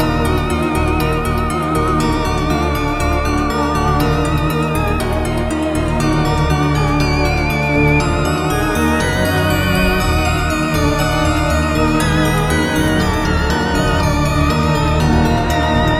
short loops 20 02 2015 2
made in ableton live 9 lite
- vst plugins : Alchemy
- midi instrument ; novation launchkey 49 midi keyboard
you may also alter/reverse/adjust whatever in any editor
please leave the tag intact
gameloop game music loop games dark sound melody tune church
church, dark, game, gameloop, games, loop, melody, music, sound, tune